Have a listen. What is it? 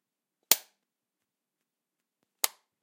This is a recording of the switch that turns on my Cooker. The sound is of me flicking the switch down, Then a brief pause before I flick the switch back up giving of a much softer sound the flicking the switch down.
Recorded using the XHY-6 microphone on my ZOOM H6 placed approx 5" on axis from the switch.
Clip gain used during post.